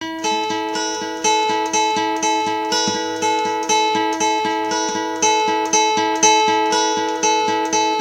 WINDOW1 Guitar
A collection of samples/loops intended for personal and commercial music production. For use
All compositions where written and performed by
Chris S. Bacon on Home Sick Recordings. Take things, shake things, make things.
drums, looping, indie, guitar, loops, sounds, beat, samples, Folk, original-music, free, rock, loop, voice, drum-beat, harmony, vocal-loops, melody, synth, acoustic-guitar, piano, Indie-folk, whistle, acapella, bass, percussion